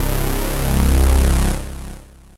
This sound was created on My Korg Ms-2000 I never got around to using the sound but think it will be cool for a Drum&Bass; Track
Dirty Sounding Bass Synth
Korg Ms-2000
Bass, club, dance, drum, dub-step, house, techno, trance